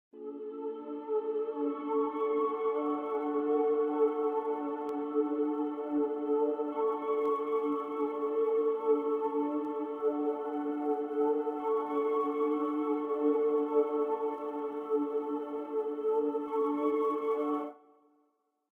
"Guardians Of Limbo" (Spectral voices, ethereal sounds, odd vocals) Sample of Fx preset from Magnus Choir VSTi software. Virtual Choir (Musical Instrument)
Software Description:
Magnus Choir is a VST, VST3 and Audio Unit virtual instrument which can be used to create natural and synthetic choirs. The male and female choruses combine to form a mixed choir, featuring the classic SATB (soprano, alto, tenor, bass) structure: women sing soprano and alto, while men sing tenor and bass. Versatile to generate a wide spectrum of choirs, vocal textures, choral pads and voices with modulation capabilities for a new level of realism in digital sound creation.
• 54 Preset Sounds ready to use, including a vast array of natural and synthetic sounds, from Oohs, Aahs, men and women in mixed choirs to the celestial choir of angels, reso pads, dark atmos, creepy voices, ambient ghostly and birdsong effects, as well as cinematic and soundscapes.
01.- Abbey Ghost
02.- Ad Infinitum Formant
audio, chant, choir, choir-vst, choir-vst3, choral, exs24, FL, free, GarageBand, gregorian, kontakt, Mac, magnus, magnus-choir, monk, nki, plugin, SATB, singing, Studio, torrent, unit, virtual-choir, vst, VST3